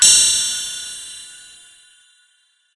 eventsounds3 - high bleeps 4a
I made these sounds in the freeware midi composing studio nanostudio you should try nanostudio and i used ocenaudio for additional editing also freeware
startup, application, bleep, click, sfx, game, clicks, effect, blip, intro, intros, bootup, desktop, event, sound